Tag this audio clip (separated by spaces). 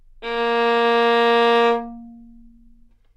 single-note multisample Asharp3 violin good-sounds neumann-U87